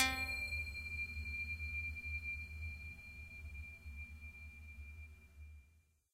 Scary sound
Perfect for a "Did you hear that?" moment.
suspense, thriller, creepy, sudden, surprise, scary, appear, horror, piano, appearance